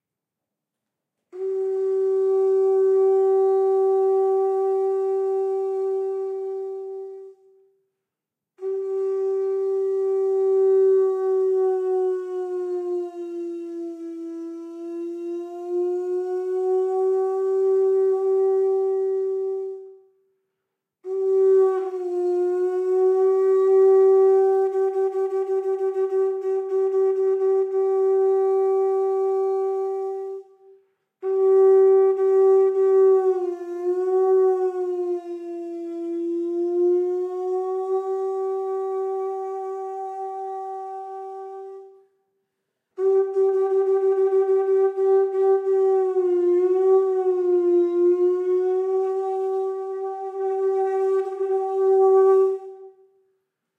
The snailhorn is a wind instrument. (skrt. Skankha, tib. Dung Kar, jap. 陣貝, jinkai, 法螺貝, horagai)
It is from the shell of a snail manufactured, the tip is detached. Often the snail shell by engraving or ornamented metal versions. Sometimes it is also a mouthpiece of metal used. With hindsight bubbles - similar to a horn or a trumpet - creates a distinctive, penetrating tone. The snail horn is used in different cultures and different symbolic meanings.
Recording: Tascam HD-P2 and BEYERDYNAMIC MCE82;
instruments, historical-instruments, field-recording, conch, snailhorn